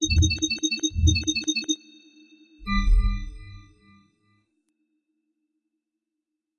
rotating-menu-sub-hit-at-end
hit, error, hud, electronic, message, button, computer, option, ui, cpu, gaming, melody, click